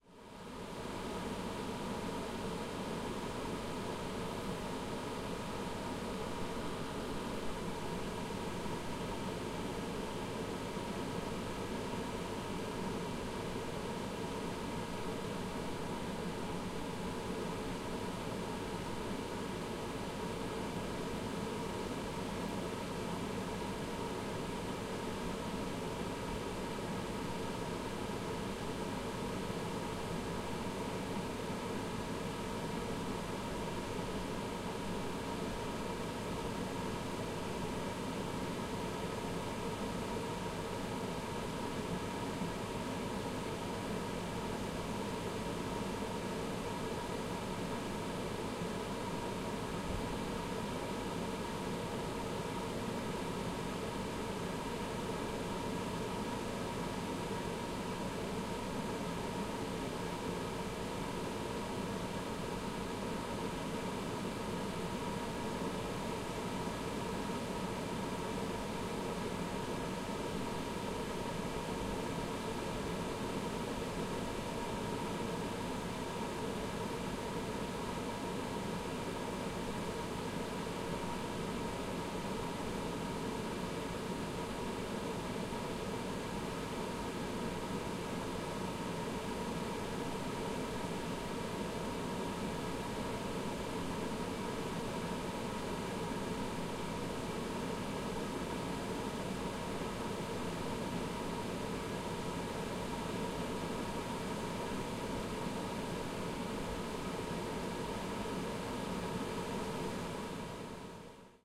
Machine-Drone3
Machine drone of a household appliance. (New Zealand)
Hum, Appliance, Mechanical, Noise, Industrial, Drone, Buzz, Factory, Ambience, Machinery, Machine